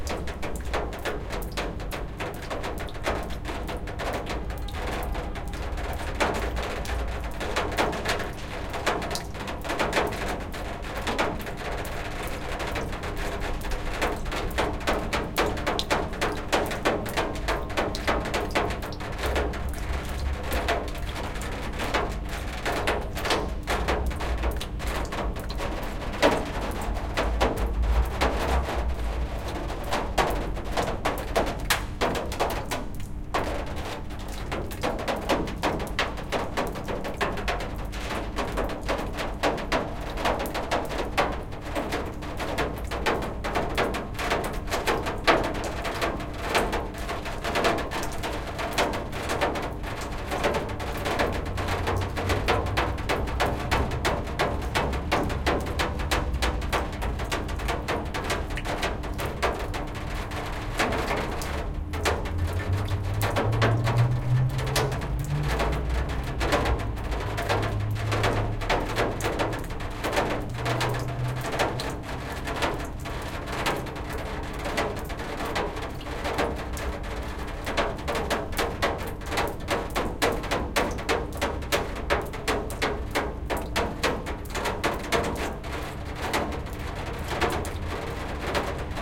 Water dripping on a tin roof, close perspective. Winter, Moscow. An entrance to old tenant building.
AKG c414b-xls-st and Sound Devices 722.